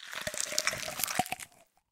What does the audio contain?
small creature bite
a small creature biting sound. it doesnt have much impact but have lot of gore